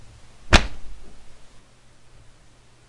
Some fight sounds I made...